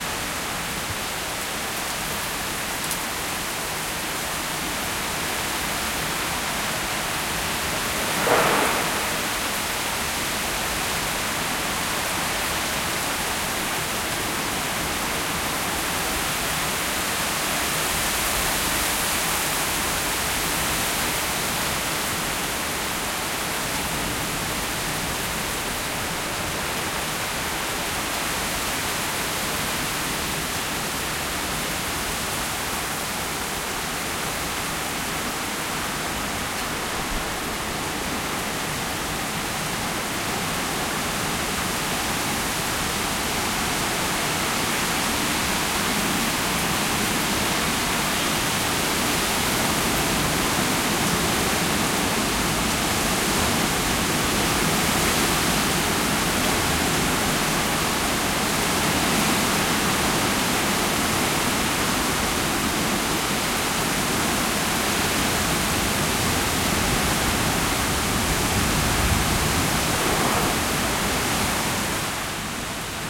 Ambient sound of rainfall in Bengaluru, India.
Bengaluru, field-recording, India, nature, outdoors, rain, rainfall, raining, umbrella, weather